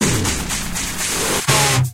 interface saw 03 122bpm
Alvarez electric through DOD Death Metal pedal mixed to robotic grinding in Fruity Loops and produced in Audition. Was intended for an industrial song that was scrapped. Approximately 122bpm. lol
electric, industrial, grind